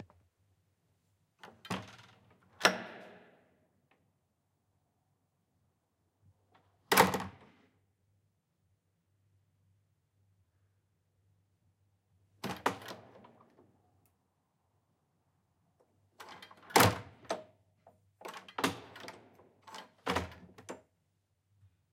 Open and Closing Door multiple times
close, closing, door, open, opening, wooden